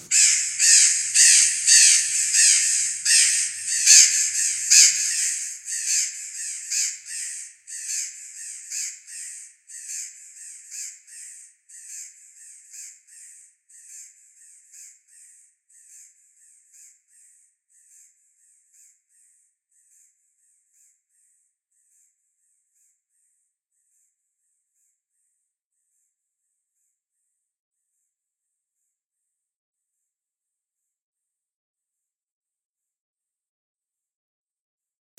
Crows-Funky mixdown
An H4N recording of a crow with echo, reverb, and an eq
bizarre, echo, birds, edited, reverb